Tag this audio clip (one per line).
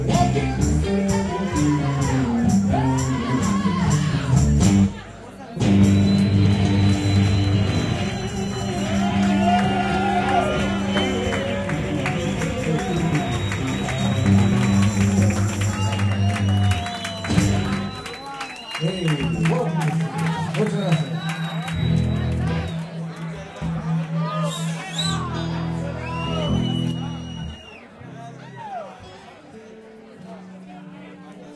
ambiance
field-recording
hand-clapping
music